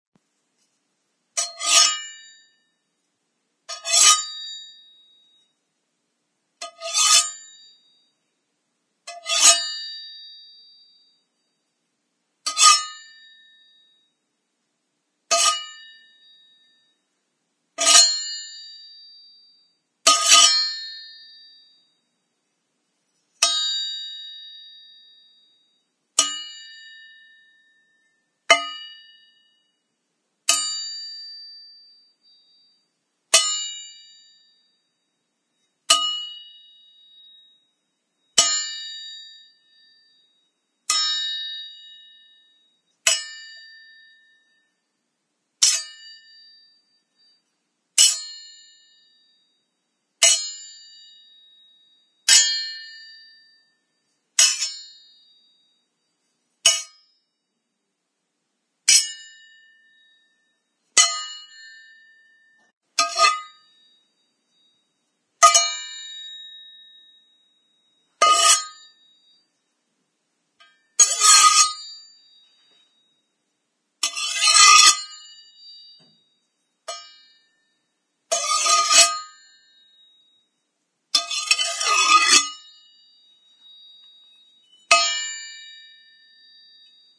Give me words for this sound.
Knife Sounds
Sounds of a blade clanging against metal.
This sound was made by scraping a kitchen knife against a pan.
Metal, Draw, Clatter, Scrape, Sword, Blade, Clang, Knife, Metallic, Scratch, Sheath